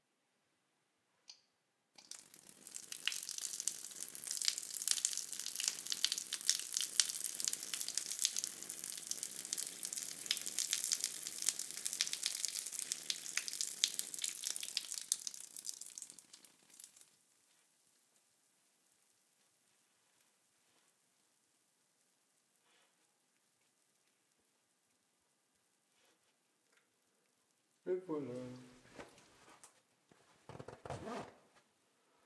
Catacombs toilet recorded on DAT (Tascam DAP-1) with a Sennheiser ME66 by G de Courtivron.